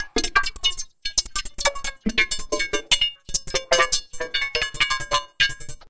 RanDom Synthesized Madness...